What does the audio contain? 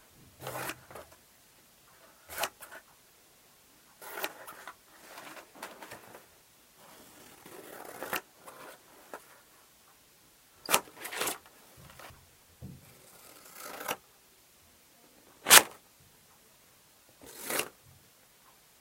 Cutting paper with scissors
Cutting a piece of paper with a pair of scissors. Different speeds and volumes are present.
cut, cutting, paper, scissors, slice, snip